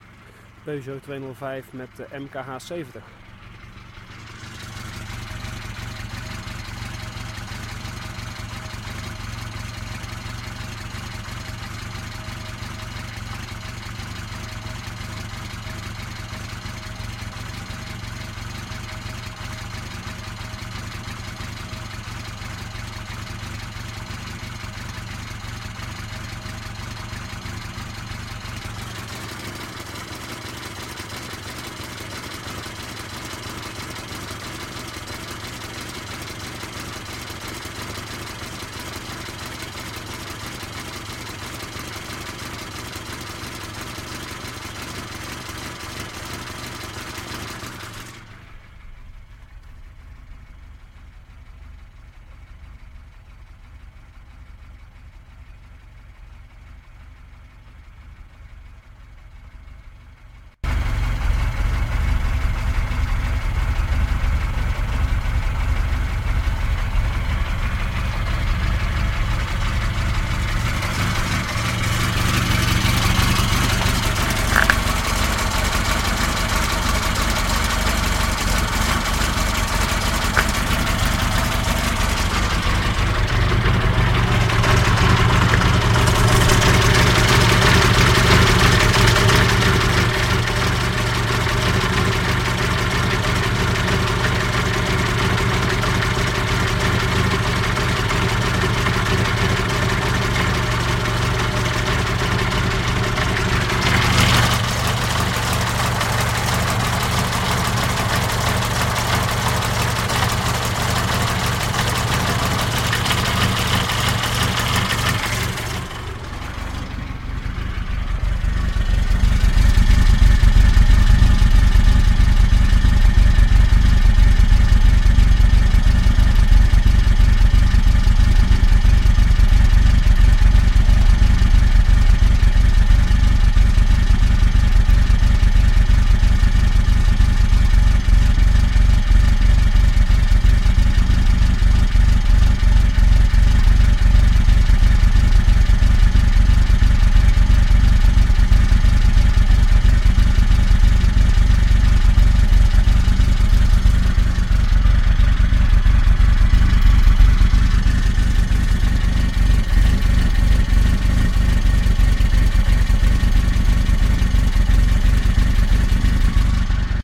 Peugeot 205 MKH70 total
Walk around a stationary running Peugeot 205 XS '88 using a DR100 and MKH70.
For everyone who loves organic sounding machines like me. Music.
Car, exterior, Interior, Peugeot, stationary